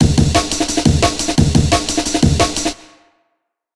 8 ca amen
amen break with delay